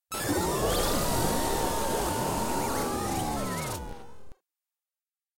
Spinning tires
Radio imaging sound effects. Created using labchirb and audacity.
bed, bumper, imaging, radio, sting, wipe